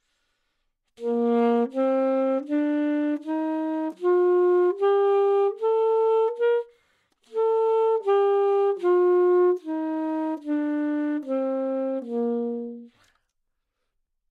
Sax Alto - A# Major
Part of the Good-sounds dataset of monophonic instrumental sounds.
instrument::sax_alto
note::A#
good-sounds-id::6807
mode::major
alto, AsharpMajor, good-sounds, neumann-U87, sax, scale